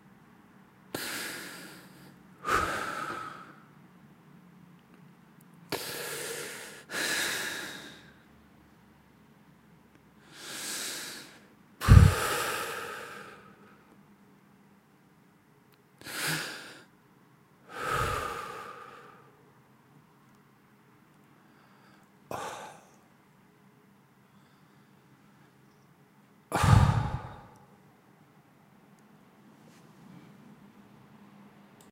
Breathing Sighs
Sigh, Breathing, Groaning